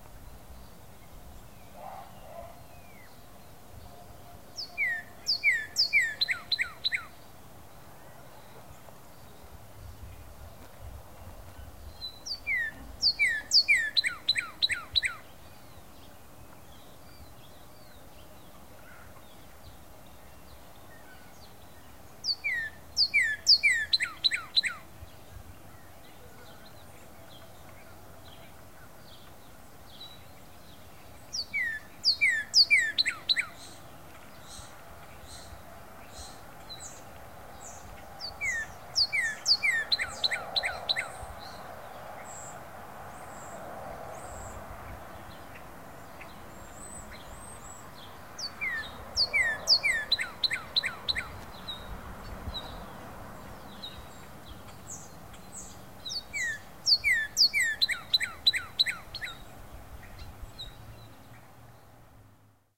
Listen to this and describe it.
This very happy bird-song was recorded in Late winter/very early spring of 2012. It was a cold, partly sunny morning after a cold night, yet this red male cardinal was singing proud and loud. Recorded in a small town, with some traffic sounds in the back. Also, if you listen close enough you will hear some starlings in the background with their waking up chorus. Recorded using the Handy/Zoom H4N recorder and a Samson C0-2 microphone mounted inside my home-built parabolic reflector.